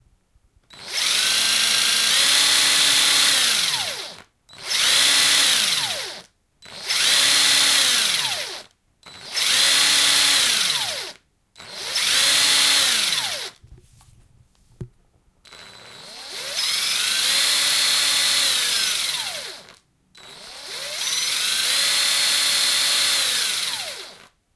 Drill Slow Stops
Plastic, Metal, Friction, Bang, Hit